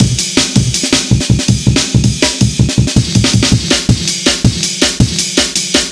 A breakbeat with a ragga drum pattern. 162bpm. programed using Reason 3.0 and Cut using Recycle 2.1.